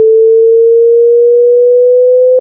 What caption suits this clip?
Short wailing alarm.
siren, alarm, wail